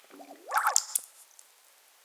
Water sound collection
wet, water, drip, hit